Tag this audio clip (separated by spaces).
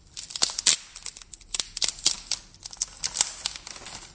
break wooden lumber breaking branches snapping snap sticks wood timber